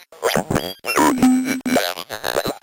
PERCUSSIVE SOUNDS. one of a series of samples of a circuit bent Speak N Spell.